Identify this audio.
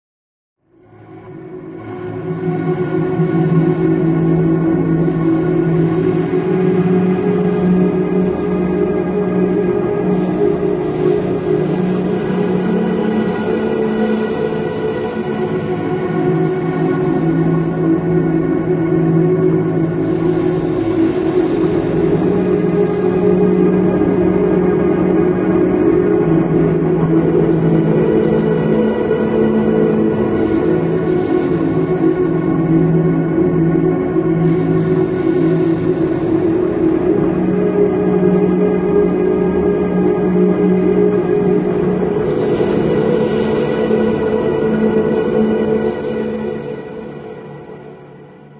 A spaced out ambient drone with some subtle glitches.
ambient, drone, glitch, relax, sound-design